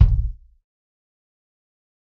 Dirty Tony's Kick Drum Mx 061
This is the Dirty Tony's Kick Drum. He recorded it at Johnny's studio, the only studio with a hole in the wall!
It has been recorded with four mics, and this is the mix of all!
drum, tonys, kit, dirty, realistic, tony, kick, punk, raw, pack